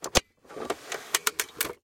mechanical open
Open the Sodaclub
open, ffnen, Mechanisch, machanical, sodaclub